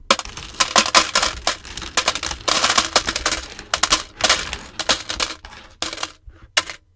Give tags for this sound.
money; payment; falling; dropping; coin; pay; coins; drop; cash; counting; tinkle; fall; change

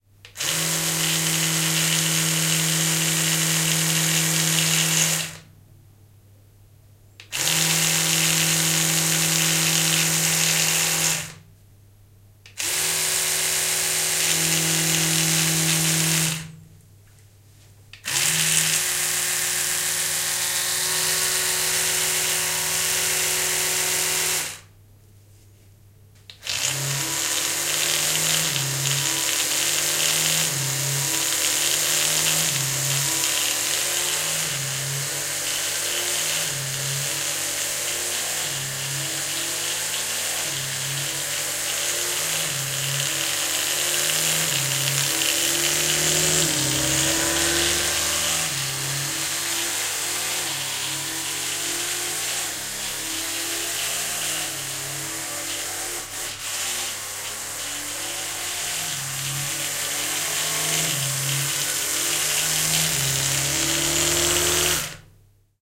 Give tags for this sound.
braun; electric; toothbrush

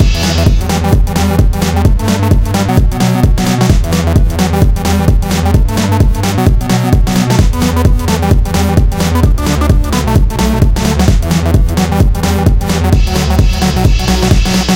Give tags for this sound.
E; minor; 130bpm

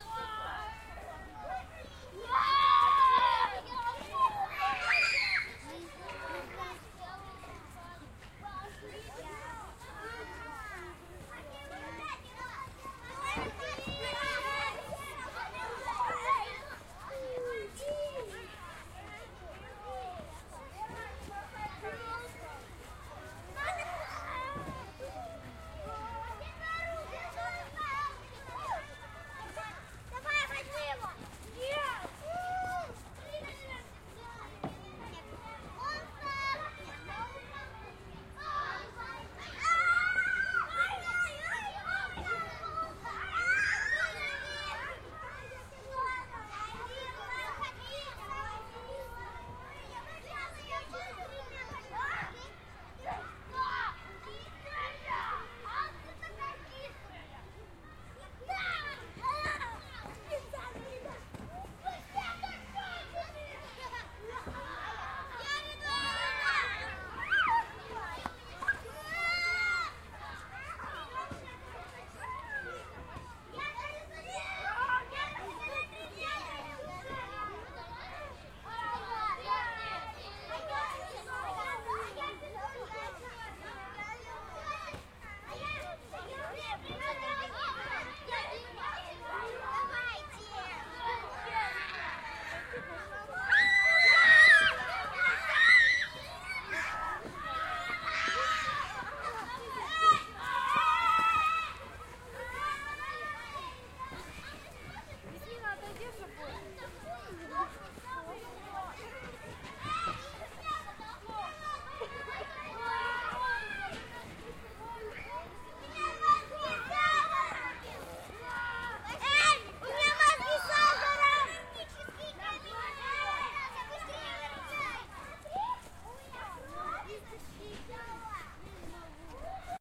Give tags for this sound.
atmosphere; autumn; background; children; city; hum; kids; kindergarten; laugh; laughter; noise; October; Omsk; park; people; Russia; saw; screams; soundscape; square; town; yard

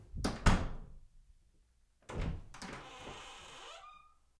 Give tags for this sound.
door; slam

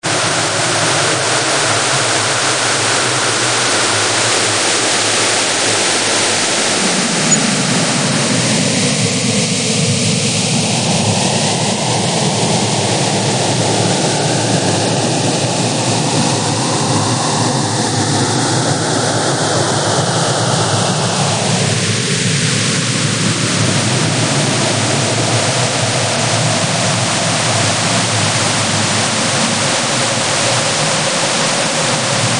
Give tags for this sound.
noise; harsh

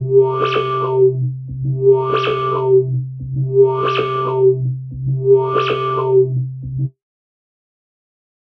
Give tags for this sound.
digital; electromechanics; artificial; electronics; soundscape; sfx; loopable; sci-fi; fx; scanner; machine; scan; game; synthetic; loop; noise; computer; science-fiction; robot; game-development

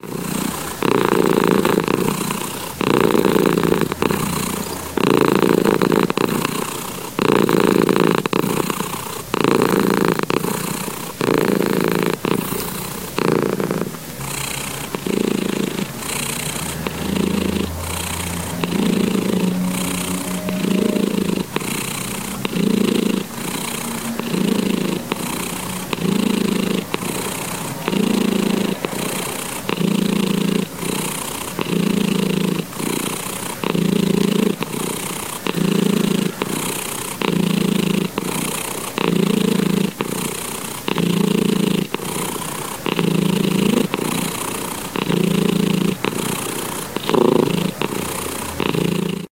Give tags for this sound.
cat; happy; kitten; purr; animal; noise

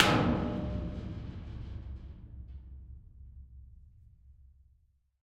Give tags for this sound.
cistern; metal; percussion

